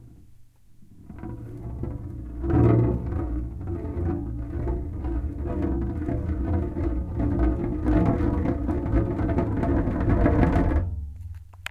Water jug twirling
Tottering a large Arrowhead water jug on the hardwood floor of the studio. Recorded using a Roland Edirol at the recording studio in CCRMA at Stanford University.
twirl, water-jug, large-container, totter, aip09